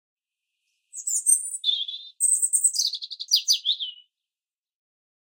Bird Whistling, Single, Robin, A
Practicing cleaning audio in the RX editor, this is a single tweet from a robin (and I know for certain it is a robin this time!). Approximately 3 meters from the recorder. The lower frequencies have been removed entirely.
An example of how you might credit is by putting this in the description/credits:
The sound was recorded using a "H6 (MS) Zoom recorder" on 25th January 2018.
Bird, Birds, Birdsong, Chirp, Chirping, Robin, Tweet, Tweeting, Whistle, Whistling